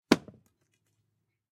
ARROW WOOD IMPACT SINGLE ARCHERY 01
Direct exterior mic recording of an arrow being fired from 40lbs English Longbow into a wooden shed.
Recorded on rode shotgun mic into Zoom H4N.
De noised/de bird atmos in RX6 then logic processing.
shot, foley, string, warfare, target, military, archer, army, close, shoot, flight, shooting, projectile